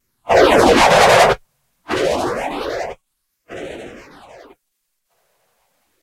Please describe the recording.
CUT Boomerang
"Paysage sonore" Boomerang
- Industrial; Spatial.
- Turbines...
^v^v^v^v^v^v^
Jam Under My Own Steam